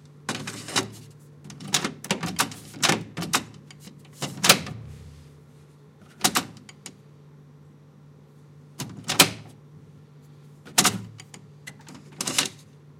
noises made inserting / ejecting a mini-cassette into a deck. Shure WL183 into Fel preamp and Edirtol R09 recorder
cassette, machine, mechanical